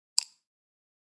Wooden sticks, like for example, a drummer playing with sticks.